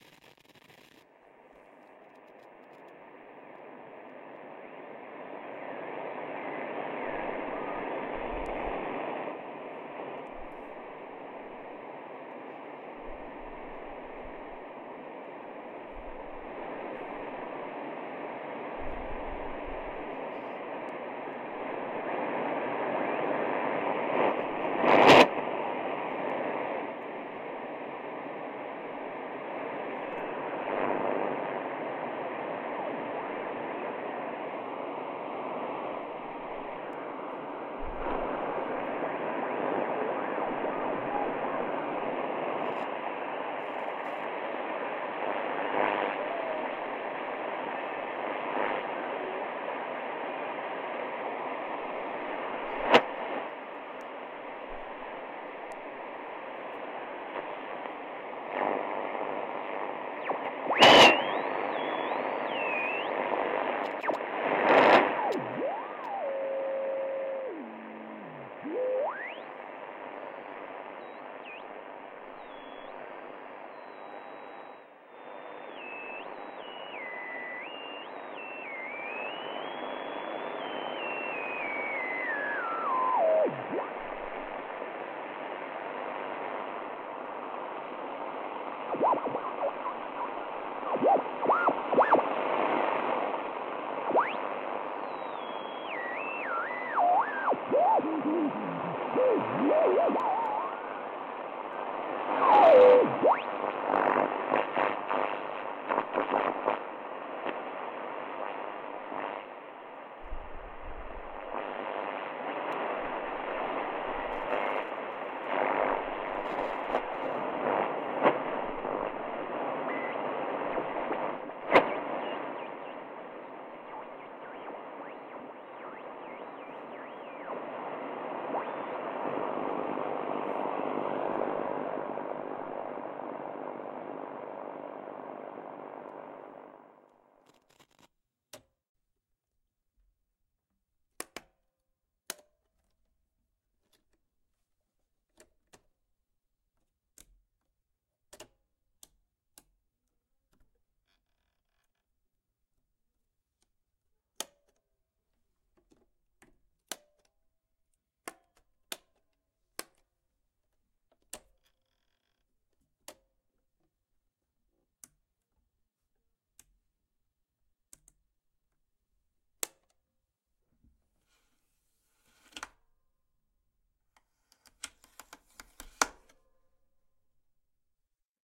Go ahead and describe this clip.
Old Radio reciever Noise Interference Knobs Pulling out Antenna
Studio. Close mic.
Manipulating with old radio reciever.
Tuning with interferences.
Noise.
Pushing the triggers.
Pulling out and on the antenna.
Old, nose, knobs, radio, reciever, antenna